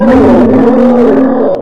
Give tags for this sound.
Dinosaur
LaCerta
Terra
Terror

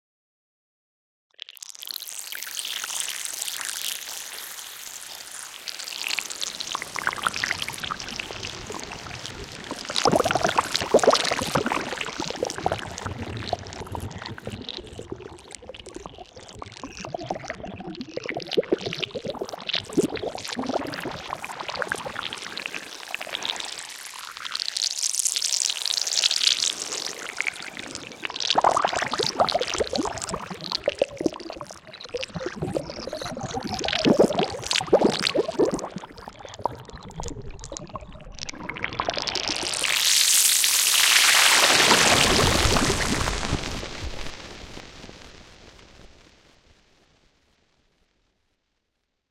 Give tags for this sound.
grain
flow
sound
pour
design
water
pouring
liquid
delay

